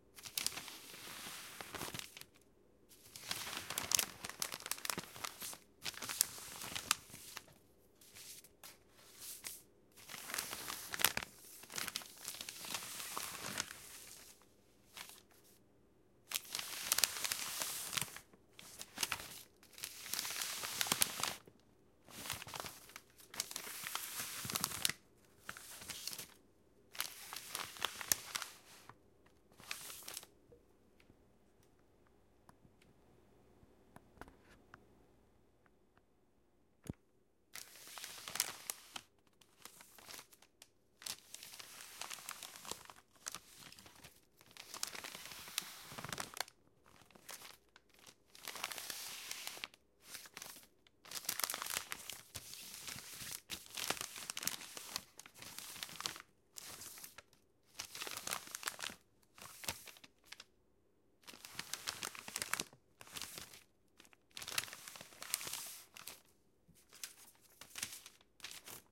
ARiggs ScrapingPaperOffGround 4.2.14 1
Scraping Paper off The Ground
-Recorded on Tascam Dr2d
-Stereo
Crumble, Ground, Notebook, Off, Paper, Scraping